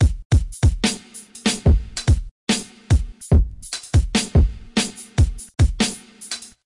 experimented on dubstep/grime drum loops
drum, dubstep, 140, grime, dub, loop, 140bpm